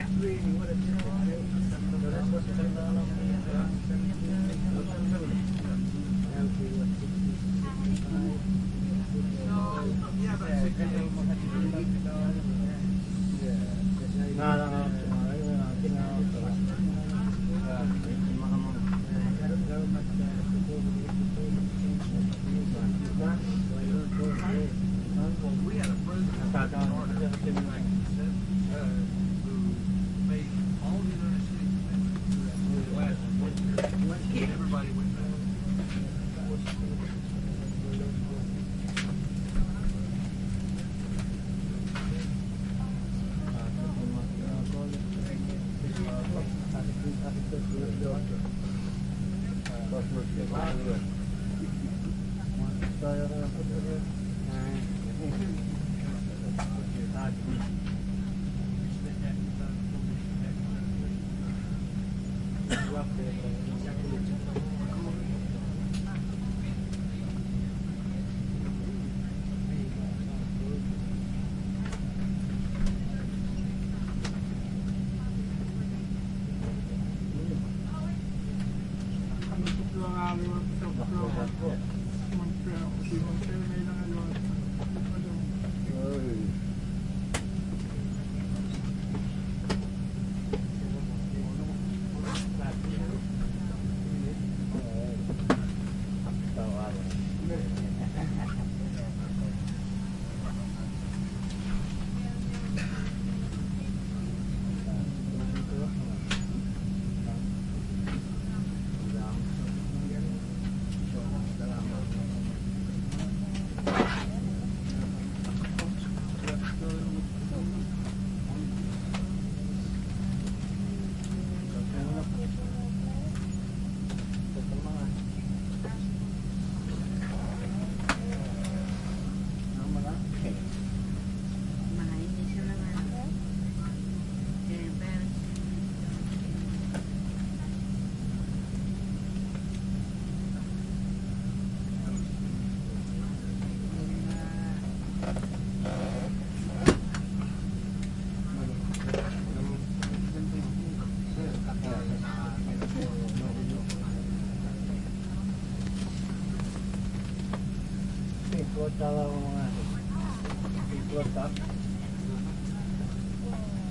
airplane jet small in flight quiet english french inuktitut voices and hum
flight, french, hum, english, small, airplane, inuktitut